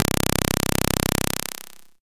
Basic impulse wave 1 C1
This sample is part of the "Basic impulse wave 1" sample pack. It is a
multisample to import into your favourite sampler. It is a basic
impulse waveform with some strange aliasing effects in the higher
frequencies. In the sample pack there are 16 samples evenly spread
across 5 octaves (C1 till C6). The note in the sample name (C, E or G#)
doesindicate the pitch of the sound. The sound was created with a
Theremin emulation ensemble from the user library of Reaktor. After that normalising and fades were applied within Cubase SX.
multisample, basic-waveform, reaktor, impulse